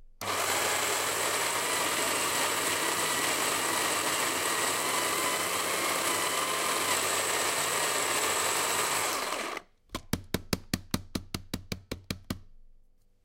STE-009 coffee mill
electric coffee mill for making espresso. recording with zoom h2. no postproduction.
espresso, hands, mill, coffee, poking, motor